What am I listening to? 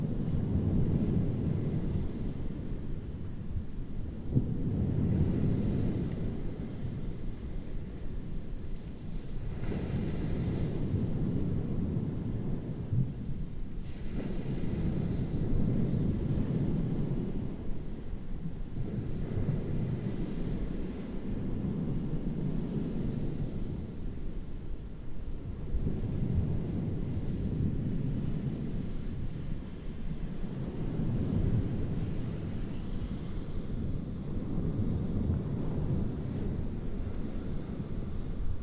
Distant Waves
Distant ocean waves lapping. Recorded in Olhão, Portugal, 2017, using a Zoom H1. Minimal processing only for reducing wind bass rumble and increasing gain.
beach, coast, distant-waves, field-recording, ocean, sea, seaside, shore, water, waves